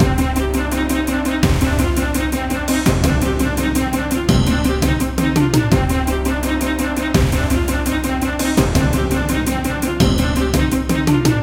Epoch of War 3 by RAME - War Victory Fight Music Loop
Epoch of War is a war theme looping sound with triumphant and cinematic feel to it. There are a few variations, available as Epoch of War 1, Epoch of War 2, and so on, each with increasing intensity and feel to it.
I hope you enjoy this and find it useful.